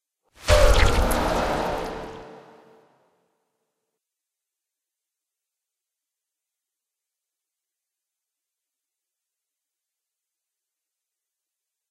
Magic: Gargle Spell
Several different mouth noises recorded from iPhone, vocoders, generated bass frequencies, and equalizers and PaulStretch Effect from Audacity.
bass, film